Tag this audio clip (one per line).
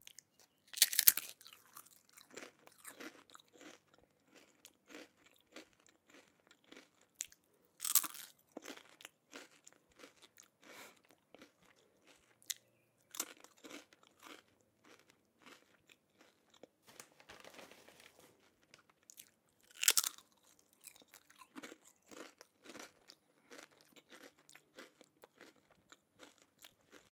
crunchy
OWI
chewing
crisps
dry-food
sfx
chips
sound-effect
eating